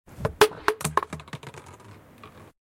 Bottle Falls
Plastic bottle drop on street outside.